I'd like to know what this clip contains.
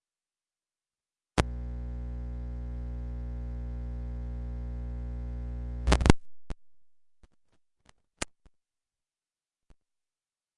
cable noise unplug plug back in
The sounds of when you unplug an audio cable and plug it back in.
Recorded like this, it was kinda ridiculous but very fun:
Unplugging and re-plugging a 1/8" to RCA cable from a phone -> used one of the RCA outputs -> RCA to 1/4" adapter -> Scarlett 2i2 -> ProTools.
audio, back, cable, electric, electricity, noise, noisy, plug, static, unplug